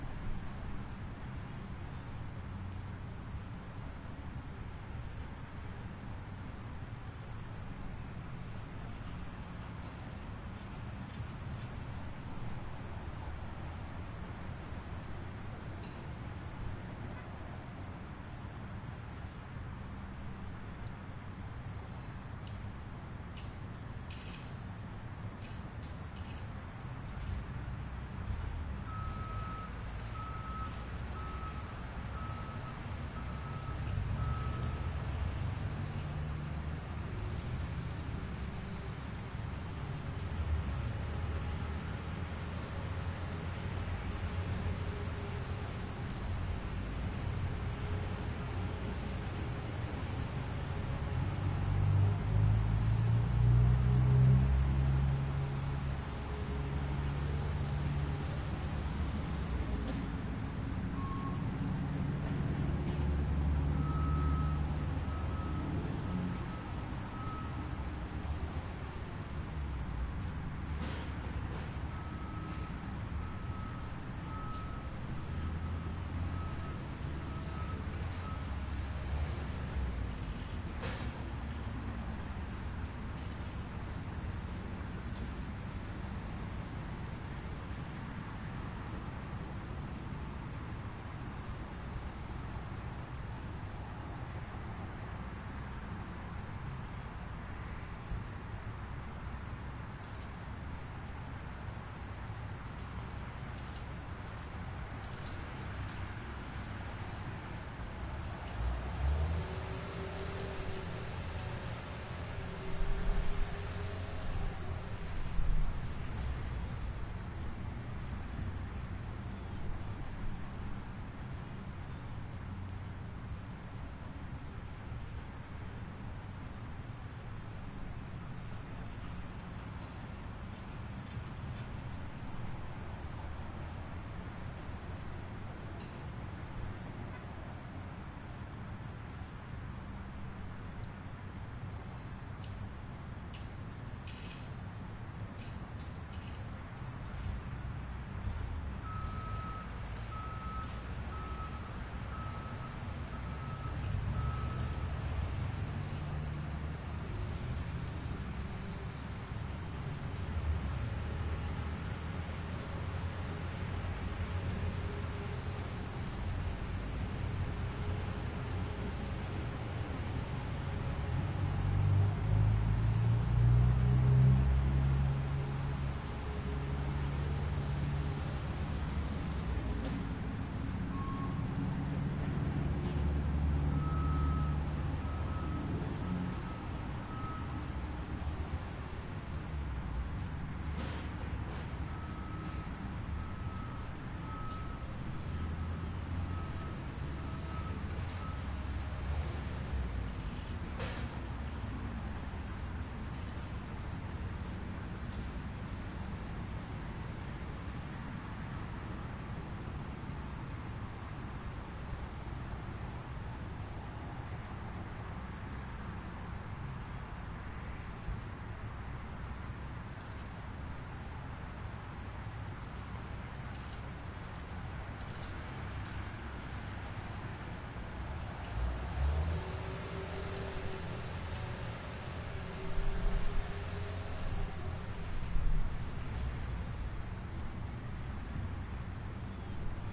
This is the sound of a city street as heard from a playground. There is a bit of construction going on to your right. You can hear the machines working and backing up.